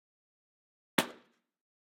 Smashing Can 04
metallic, object